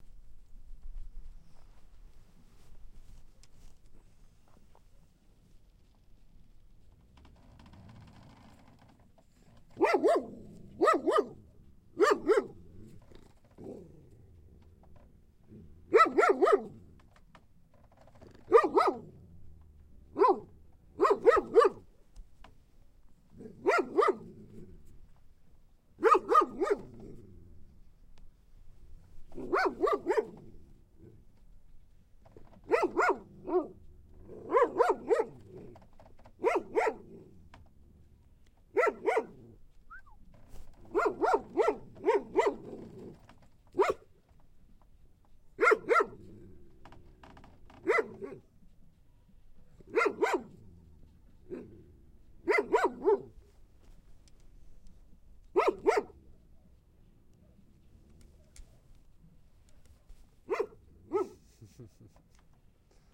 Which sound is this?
Barking dog-close
Clean stereo recording of a dog barking (close).